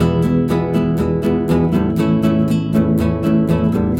Guitarra acústica 2 (Acoustic guitar)
Una guitarra acústica tocando las notas Mi y La. Grabada con una Zoom H6.
An acoustic guitar playing the E and A notes. Recorded with a Zoom H6.
acordes, acustica, chords, guitarra, nylon-guitar, acoustic, guitar-chords, guitar